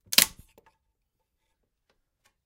Wood Snap 3
wood, pain, broken-bone, sharp, snap, hurt